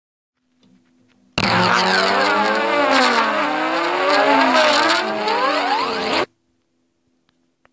Zipper + fart feel. Gravador na corda da guitarra
The sound of my phone scratching my guitar string while recording.
acoustics, Guitar, Phone, Recorder, Strings